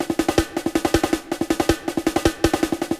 Slightly more strictly. This gives you a more straight 16th feeling with some 8th notes included that points out the beat.